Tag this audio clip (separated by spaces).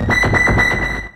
one-shot synth